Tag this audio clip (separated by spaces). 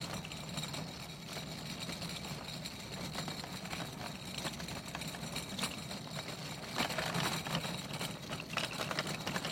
CART ROLLING